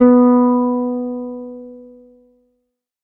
Third octave note.